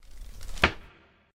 13 cupboard rustledoor verb
taken from a random sampled tour of my kitchen with a microphone.